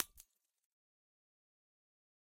Small glass holiday ornament shattered with a ball-peen hammer. Bright, glassy shattering sound. Close miked with Rode NT-5s in X-Y configuration. Trimmed, DC removed, and normalized to -6 dB.
bright, glass, shatter